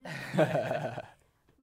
Laugh Man 2 20 years old
Young man laughing reaction
Reaction, Laugh, excited, Human, funny, uncompressed, old, Laughing, young, 20, Voice, Man, laughter, years, male